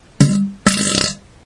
toilet fart 7
fart poot gas flatulence flatulation explosion noise
explosion, fart, flatulation, gas, poot